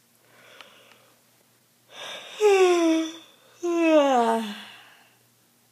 Me Yawning
I was really tired one night so I decided to record my yawning before I went to sleep.